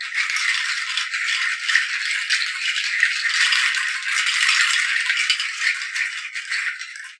A rubber nut shaker "roll" recorded for about 7 secnods on a fairly cheap mic, noise removed, and amplified to max volume without distortion.
jingle, long, nut, roll, rubber, rubber-nut-shaker, shaker, wood